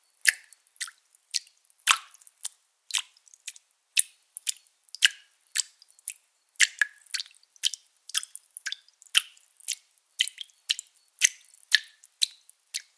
A slimey and dry blood dripping loop sound to be used in horror games. Useful for evil areas where sinister rituals and sacrifices are being made.